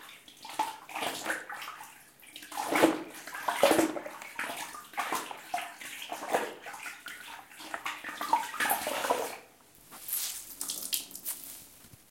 rinse floorcloth
bathroom, floorcloth, cleaning, water, rinse